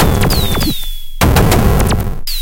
20140316 attackloop 120BPM 4 4 Analog 1 Kit ConstructionKit HardWeirdElectronicNoises07
This loop is an element form the mixdown sample proposals 20140316_attackloop_120BPM_4/4_Analog_1_Kit_ConstructionKit_mixdown1 and 20140316_attackloop_120BPM_4/4_Analog_1_Kit_ConstructionKit_mixdown2. It is an hard and weird electronic loop with noises which was created with the Waldorf Attack VST Drum Synth. The kit used was Analog 1 Kit and the loop was created using Cubase 7.5. Various processing tools were used to create some variations as well as mastering using iZotope Ozone 5.
120BPM, ConstructionKit, dance, electro, electronic, loop, percussion, rhythmic